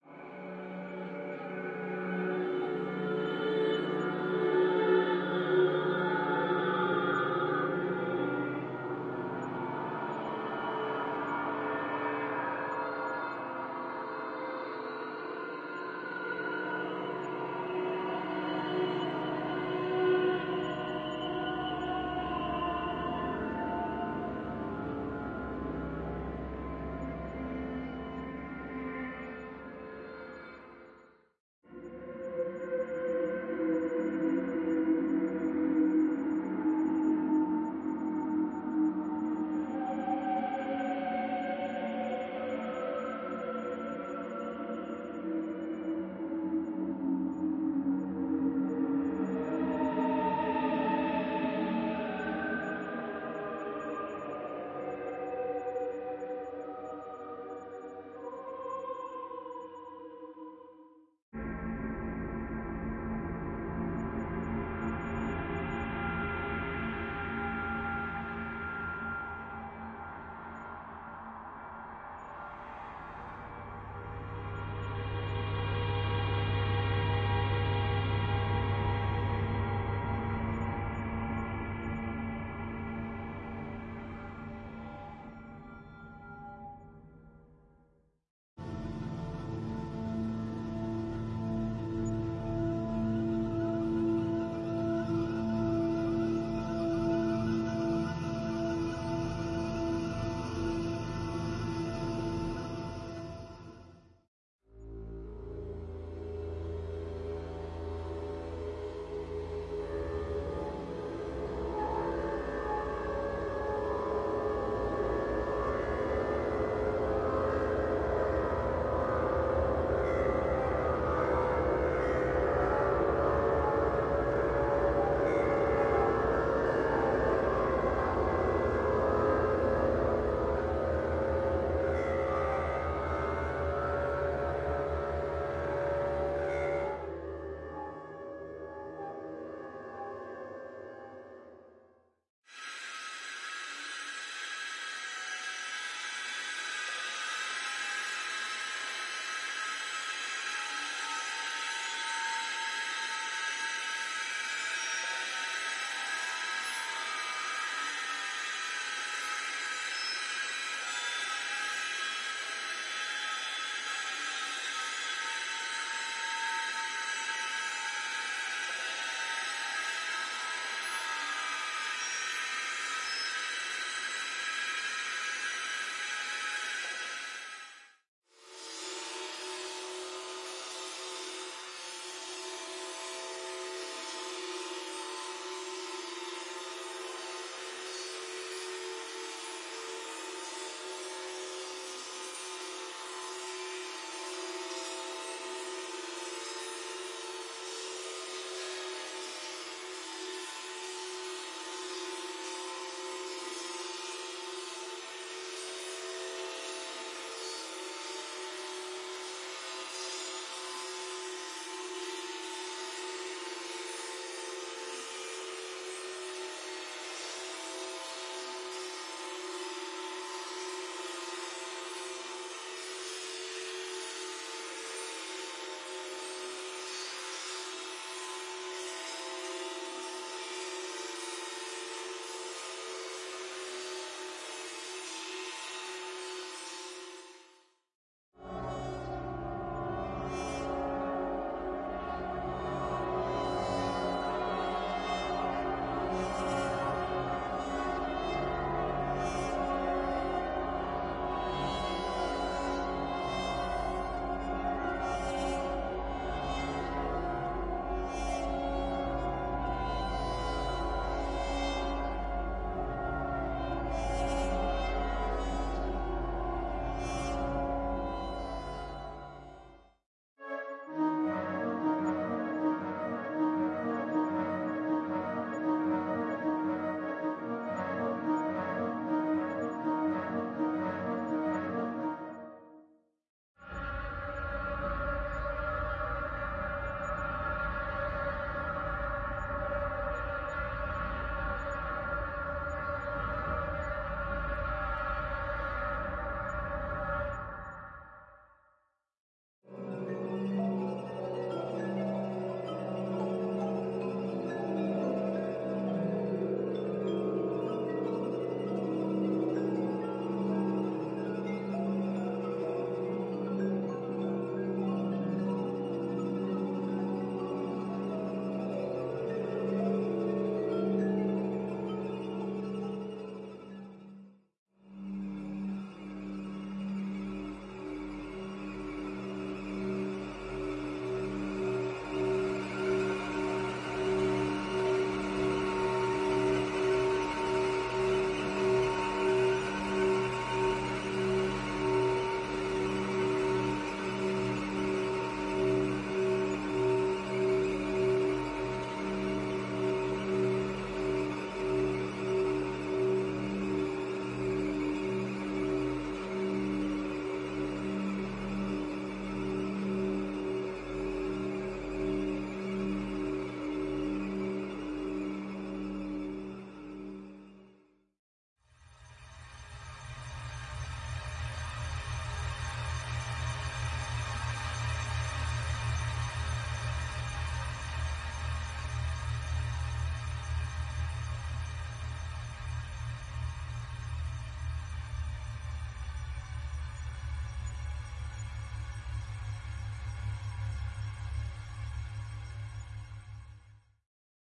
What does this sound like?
Choirs, ghosts & orchestras Morphagene reel
A selection of custom made choirs, orchestral takes, drones and other scary noises. All sampled from old vinyl, Spitfire Albion series, Spectrasonics Omnisphere 2.5, Native Instruments Kontakt libraries. Spliced into a reel for the wonderful Make Noise Soundhack Morphagene.
choirs, ghosts, horror, dark, reel, Make, pads, ambient, creepy, atmosphere, drone, eery, drones, Morphagene, suspense